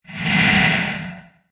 Processed sound of a single exhale.
breath, creepy, ghost, growl, horror, human, monster, whisper